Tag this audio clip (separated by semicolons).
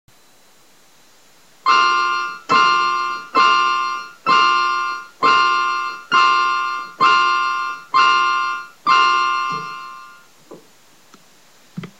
classic; ear-pearcing; piano; scary